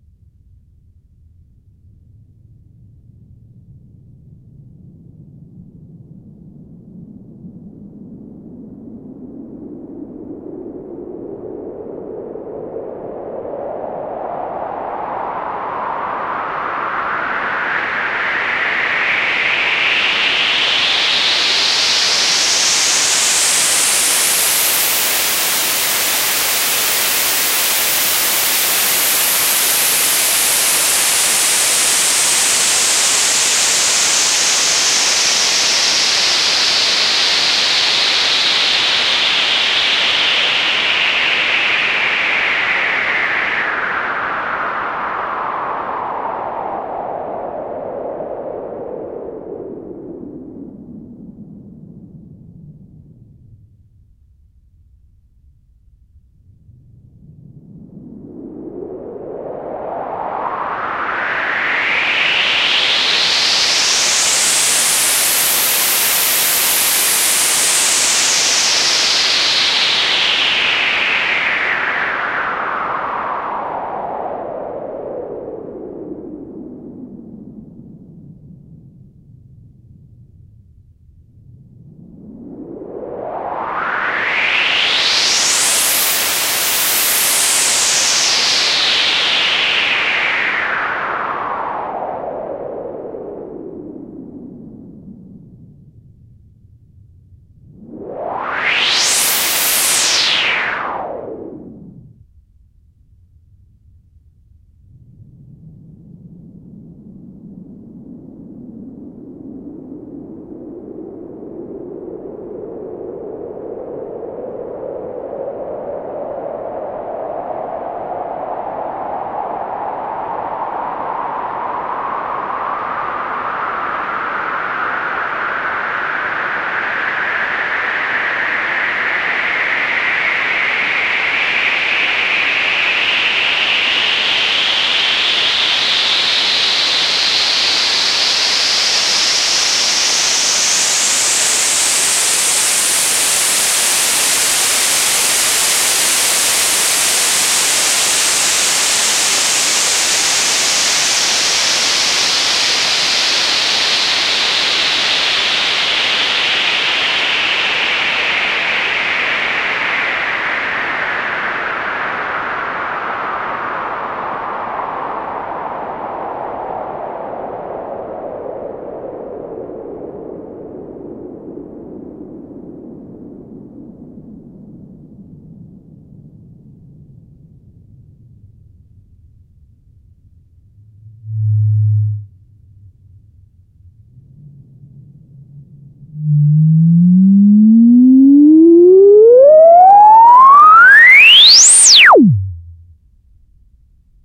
noise sweep
filter sweeps in different lengths made with eurorack elements
club, dance, drop, dub, dub-step, EDM, effect, electro, electronic, filter, fx, glitch-hop, house, minimal, noise, rave, sweep, techno, trance, trap, white